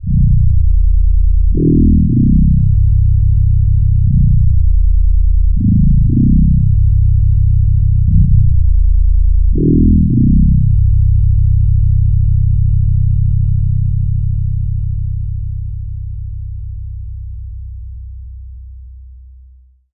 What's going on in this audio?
lf-tones
Combination of the low frequency bass sounds. Re-verb and other effects added to create a dark and serious mood.
bass, low-frequency, tones